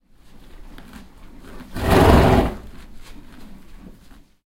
My horse "Bandit Estel" is snoring
horse, stable, country-life, village, animals, countryside, snoring, field-recording, country